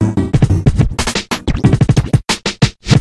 92bpm QLD-SKQQL Scratchin Like The Koala - 010